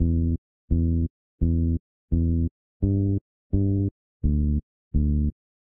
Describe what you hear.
85bpm - 1 bass chord progression A
Simple bass line in the key of C minor under chord progression A at 85bpm. This should accommodate any other riffs in the key of C minor under chord progression A in this pack.
85-bpm, 85bpm, bass-line, chord-progression-A, simple-bass-line, simple-riff